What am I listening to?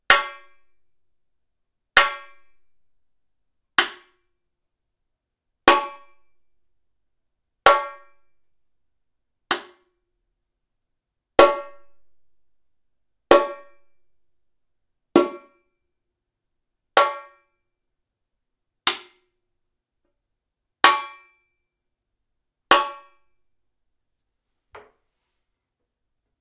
Rimshot on a repinique (samba drum).
Recording hardware: Apogee One, built-in microphone
Recording software: Audacity